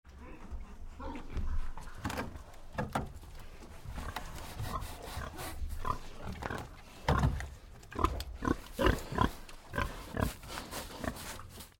Big pig bites wood and scratches its back on it, recorded at Kuhhorst, Germany, with a Senheiser shotgun mic (sorry, didn't take a look at the model) and an H4N Zoom recorder.